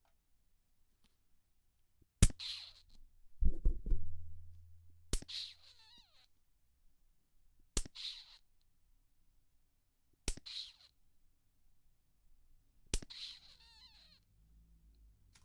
Squeezing a Gatorade bottle to create a squirting sound.